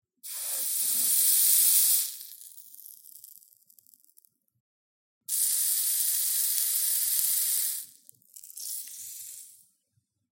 Hot Knife in Butter
Hot knife melting butter.